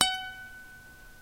Plucking headstock strings on my Yamaha acoustic guitar recorded direct to PC with Radioshack clip on condenser mic.
acoustic, guitar, headstock, pluck